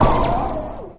STAB 013 mastered 16 bit from pack 02
An electronic effect composed of different frequencies. Difficult to
describe, but perfectly suitable for a drum kit created on Mars, or
Pluto. Created with Metaphysical Function from Native
Instruments. Further edited using Cubase SX and mastered using Wavelab.